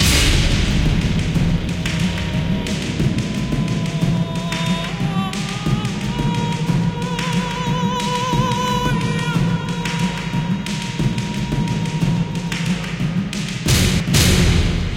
Tribal-continue
For this sample, i use NI Kontakt and many instruments for him...This sample i use in my production for our game projects. Ready for loop. Recorded in Edison by Fruity Loops edited in SoundForge. Enjoy my best friends!
I realy will be glad if you will use it and found it fit for your projects!
jungle, nature, ambient, hollywood, loop, game-trailer, filp, apocalypse, creature